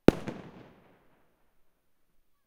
053 Fireworks, Kids
Date: ~12.2015 & ~12.2016
Details:
Recorded loudest firecrackers & fireworks I have ever heard, a bit too close. Surrounded by "Paneláks" (google it) creating very nice echo.
Bang, Boom, Explosion, Firecrackers, Fireworks, Loud